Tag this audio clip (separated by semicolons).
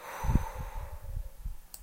exhale; Human-body; people